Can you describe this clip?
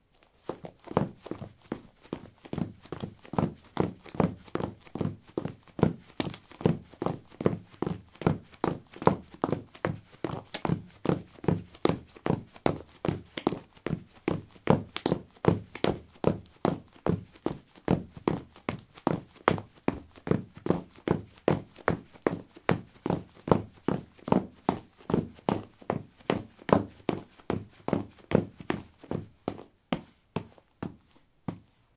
Running on concrete: woman's shoes. Recorded with a ZOOM H2 in a basement of a house, normalized with Audacity.